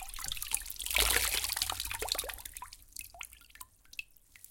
Squeezing sponge into bucket of water
Squeezing a sponge into a plastic bucket water. Recorded with a Zoom H4n